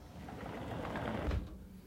Sliding door sound effect I made for a video game I developed.